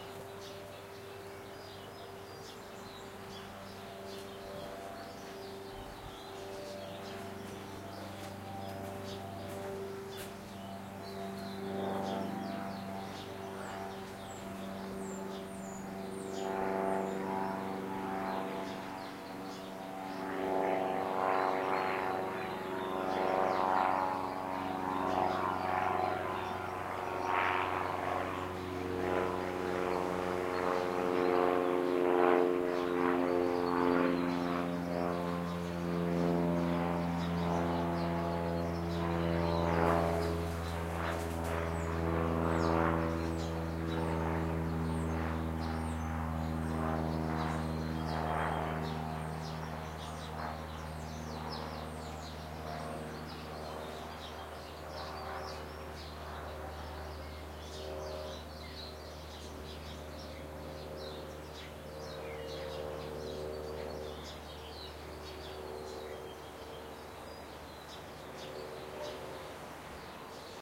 Propeller aircraft sound captured mid-afternoon on a
sunny day with birds in the background.